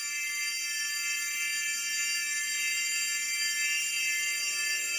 A less bell like shimmering noise, made in Gladiator VST